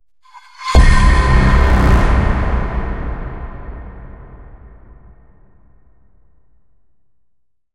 BRAAM-HIT
Made with a synth, percussion/metal samples and post-processing fx.
dramatic, film, cinematic, impact, movie, synth, sci-fi, sfx, dark, sound-design